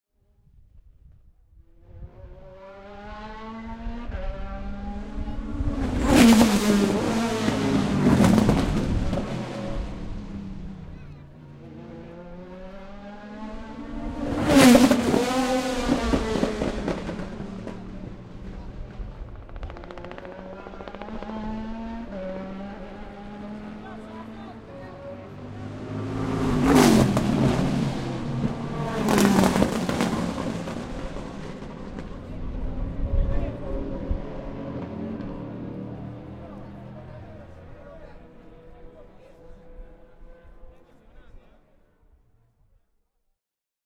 FiaGT.08.PotreroFunes.RedHotBrakes.65
A sudden approach of a high speed car braking hard at a chicane
accelerating, ambience, car, engine, field-recording, helicopter, noise, race, racing, revving, zoomh4